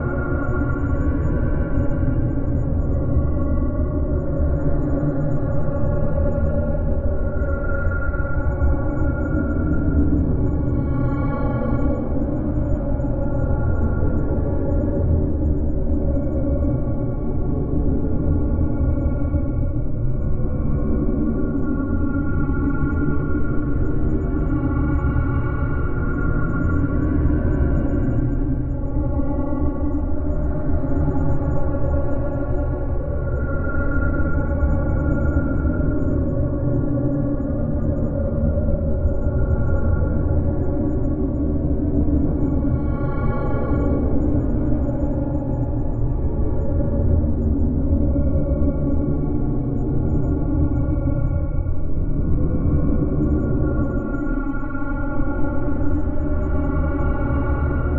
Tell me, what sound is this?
Unhappy-Drone
Making some loops, la la la.
This was generated with a combination of NI Reaktor, a Paulstretch algorithm, and some clever looping in Logic Pro X